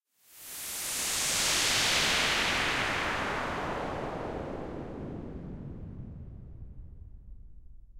A white noise downwards sweep.